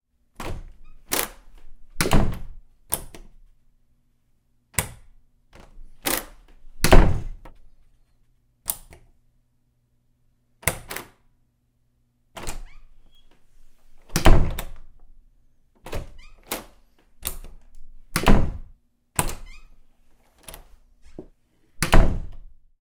Doors Wooden CloseOpenKnobRattle
A recording of opening, closing, locking, and unlocking my bedroom door.
close, door, doors, house, household, knob, latch, lock, open, rattle, shut, slam, wooden